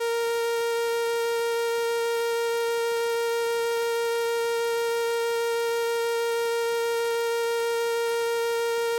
Transistor Organ Violin - A#4
Sample of an old combo organ set to its "Violin" setting.
Recorded with a DI-Box and a RME Babyface using Cubase.
Have fun!
strings, combo-organ, raw, analogue, 70s, sample, electronic-organ, vibrato, vintage, electric-organ, transistor-organ, string-emulation, analog